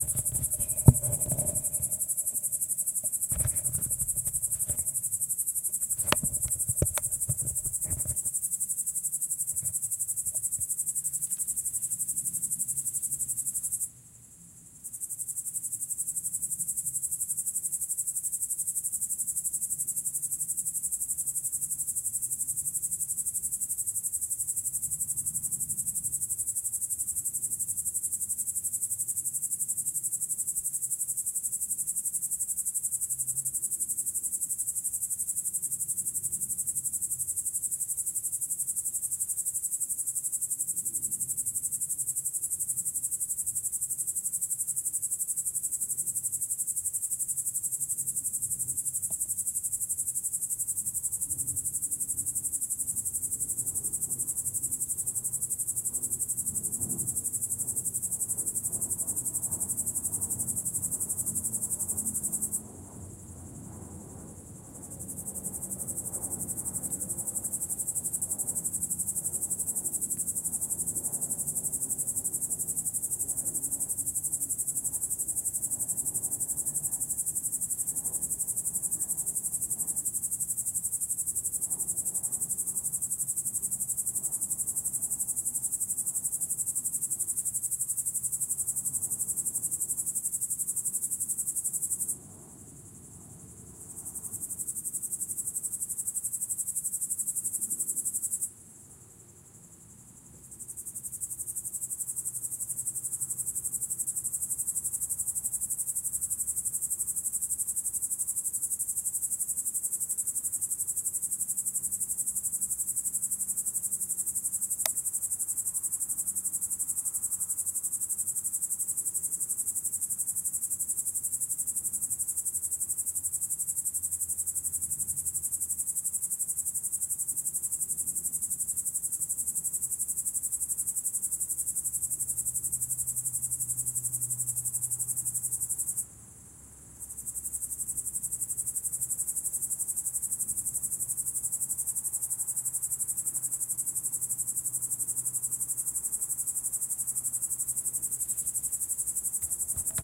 Summer night ambience near Moscow
Summer night near country house in Russia. Cicadas, planes overhead. July 2012
ZOOM H2n MS mode. Sorry I didn't cut the handling noise.
nature, cicadas, suburbs, ambience, summer, moscow, russia, night, insects, field-recording, plane